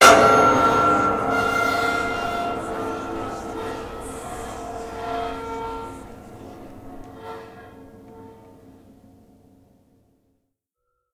a big crash